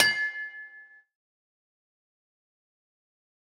EQ'ed and processed C1000 recording of a thin scaffold cage hits. I made various recordings around our workshop with the idea of creating my own industrial drum kit for a production of Frankenstein.